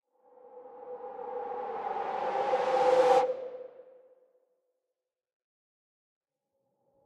Whoosh Simple ER SFX 1
air, long, swish, swosh